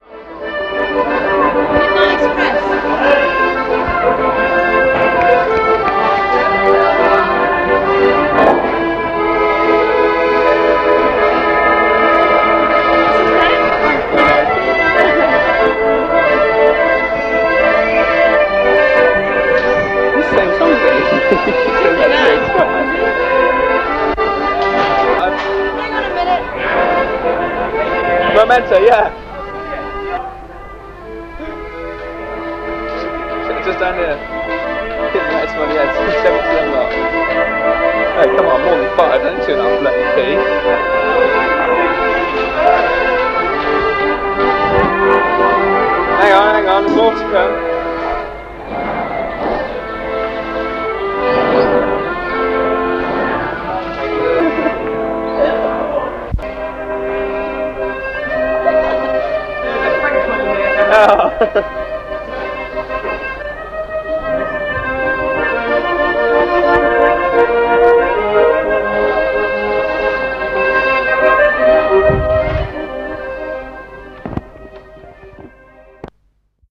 Sample of a busker playing the accordion in a Pamplona side-street in 1985. Recorded with a Sony Walkman using the in-built mics. Some background talking. Recorded onto TDK D90 cassette and stored since then in damp cellars, sheds, and long forgotten drawers. Just today transferred to digital using my fathers old Decca Legato tape player which we purchased in the early 70s to enable us to send messages to my uncle who had emigrated to Australia. Dad says the player cost over £30 then which was more than a weeks wages at the time.
I was Inter-railing around Europe at the time but the recording seems to stop in Pamplona. Six weeks later all my money and passport was stolen while in Crete and I made my way home via the British Consulate in Athens.